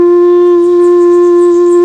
male vocalizations voice
male, voice, vocalizations